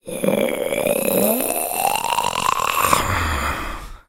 A voice sound effect useful for smaller, mostly evil, creatures in all kind of games.
gaming, goblin, game, gamedev, kobold, gamedeveloping, voice, indiegamedev, vocal, RPG, small-creature, indiedev, minion, Speak, videogames, fantasy, Talk, games, arcade, creature, sfx, videogame, imp, Voices